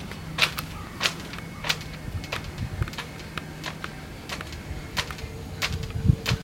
Wind slapping rigging against ship masts, tools running in the distance.
Recorded at Fambridge Yacht Haven, Essex using a Canon D550 camera.